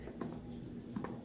Shock stick-body.